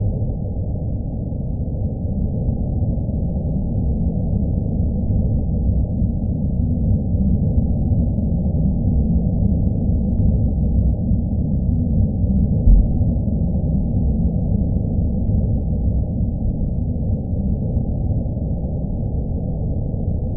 ELEMENTS EARTH 02 Earth-Rotation
Sound created for the Earth+Wind+Fire+Water contest
synthesised sound with 3 noise waveforms
(used 3 layered synths: Xhip, Foorius and polyiblit)
3 different filter types move slowly modulated via lfo
Each was delayed, panned and reverberated separately
Attempt to simulates the movement of the earth,
its spin seen from a far perspective
This could be in handy for documentary scoring
ambient, background, drone, element, noise, soundscape